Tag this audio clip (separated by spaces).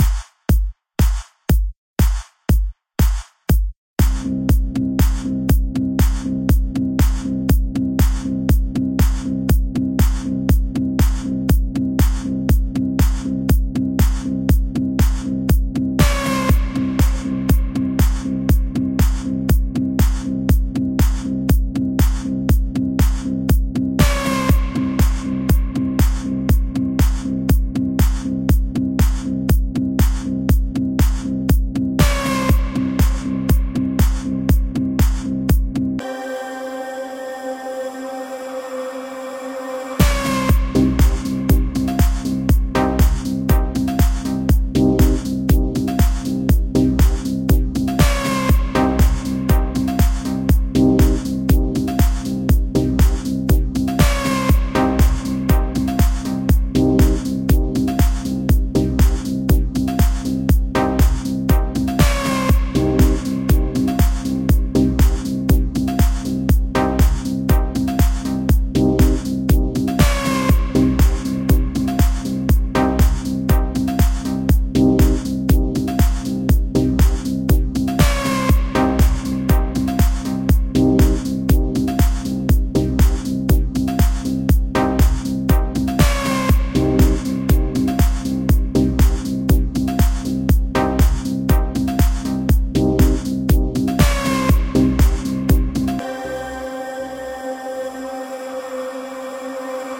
Hit LeAd techno Kick bounce Reason house music Punch pan